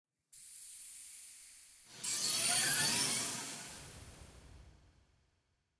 Hydraulic Door WithDecompression
air, Close, Door, hydraulic, machinery, Metal, Open, SciFi